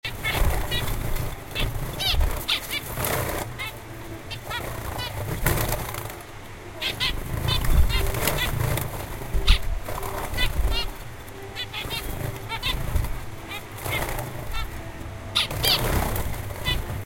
Parrots talking
There are some parrots having a conversation